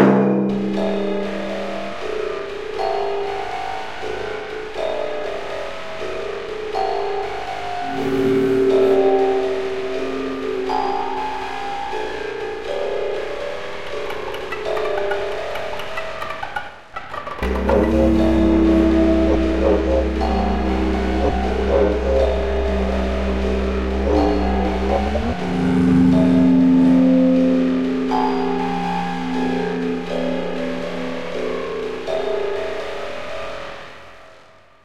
A seamless loop from my collab entry for Dare 32 while it is still work-in-progress.
Created in Ableton Live. Volume envelope applied in Audacity to correct volume balance.
Using the following sounds:
- From Thalamus sample library
Clicks & Keys_Clavicordio - String effect 9
- From Luckilittleraven
Didgeridoo samples